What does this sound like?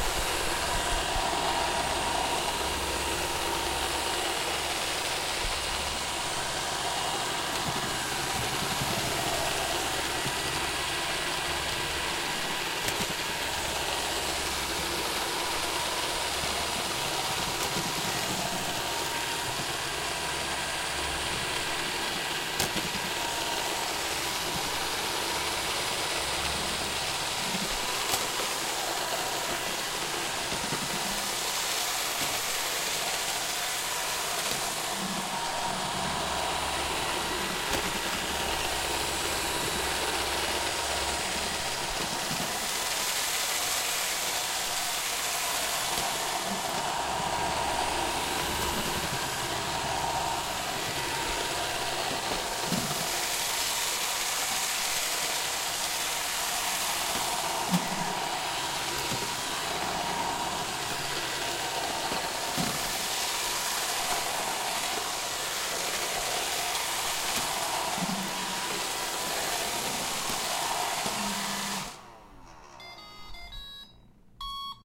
A recording of a Roomba robotic vacuum cleaning carpet and tile